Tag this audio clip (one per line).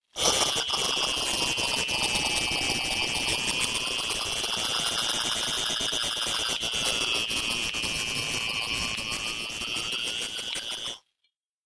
aliens
laser
scifi
spaceship
ufo